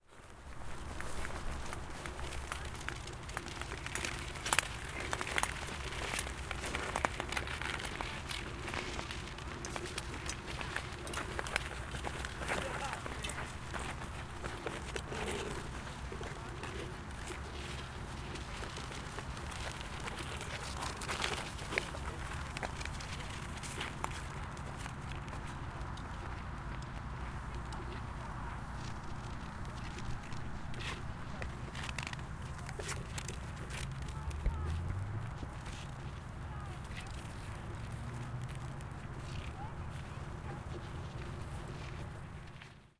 080101-iceskating-lake
Ice-skating sound in the Netherlands, on a froze lake. Stereo recording. Highway in the distance.
distance, highway, ice-skating, lake, nature, skating, speed-skating, winter